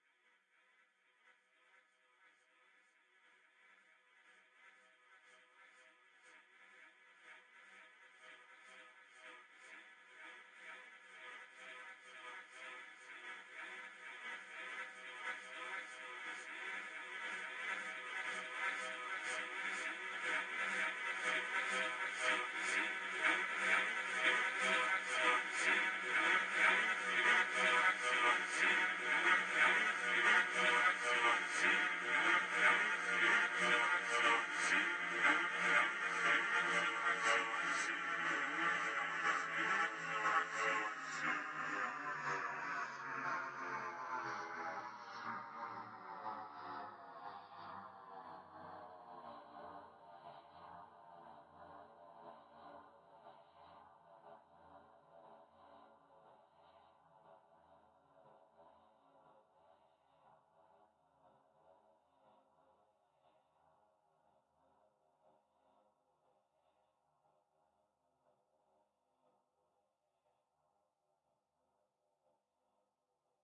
sample to the psychedelic and experimental music.
AmbientPsychedelic, ExperimentalDark, Noise